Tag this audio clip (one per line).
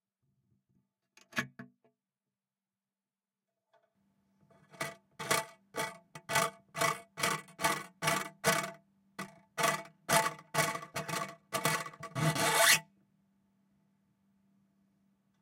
spring
plastic
pvc
pipe
delphi
s1
pipes
string
s4
rubber
c4